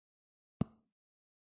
Sound of a single finger tip on a touch screen. Recorded with H2n, optimised with Adobe Audition CS6. Make sure to check the other sounds of this pack, if you need a variety of touch sreen sounds, for example if you need to design the audio for a phone number being dialed on a smartphone.
screen, touch, touch-screen, touchscreen